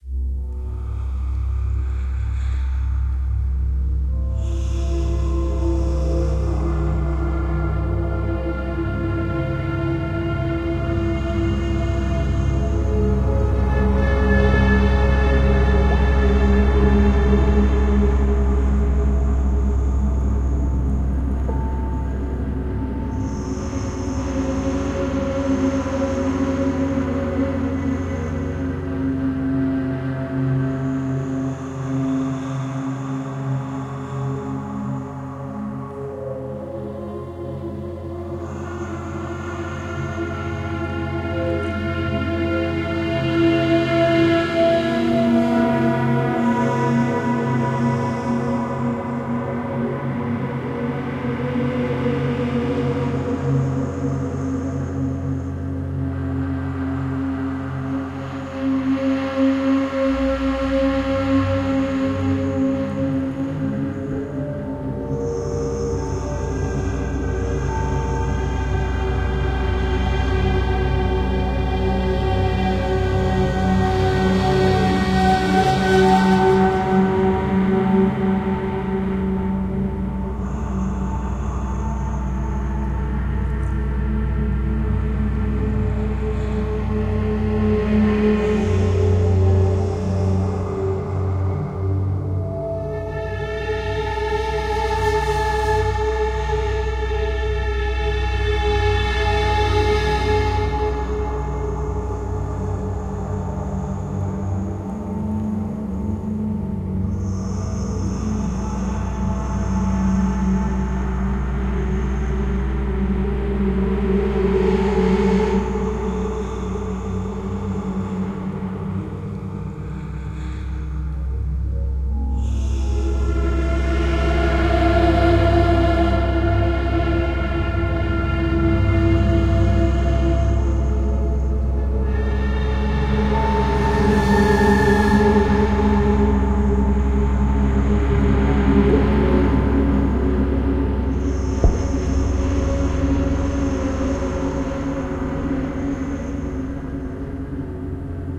Dark Myst Angels Chill Mood Thriller Horror Scary Drone Atmo Cinematic Film Movie Surround